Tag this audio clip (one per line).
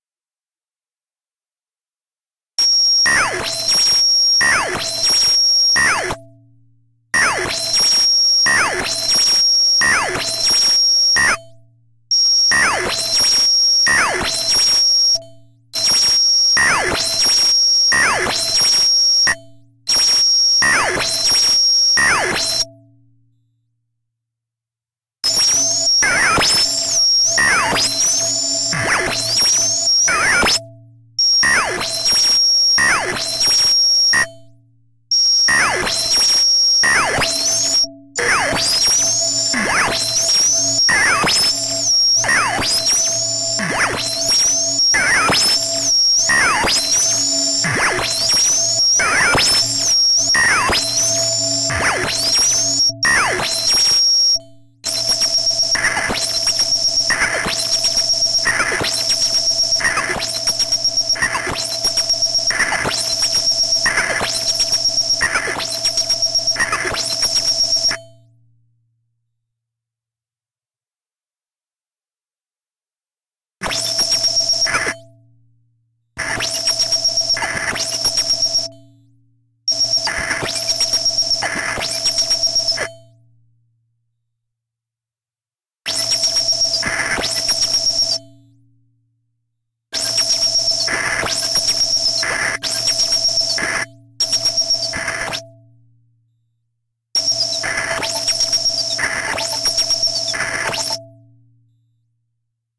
metalic
noise
synth